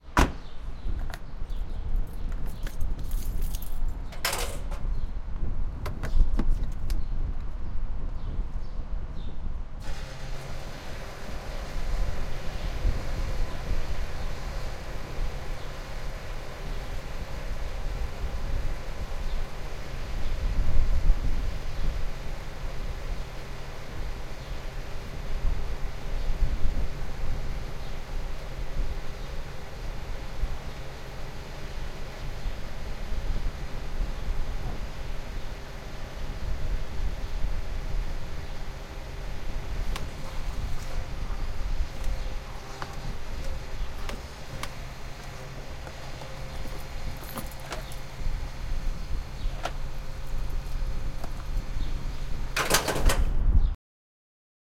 peugot 206 car exterior closing door pumping gas bezine ambience birds cars wind
Recorded with a Sony PCM-D50 from the outside of a peugot 206 on a dry sunny day.
Refilling at a gas station.
Sorry for the wind. There aren't any building in this part of town, yet.
206, ambience, bezine, birds, car, cars, closing, door, exterior, gas, peugot, pumping, wind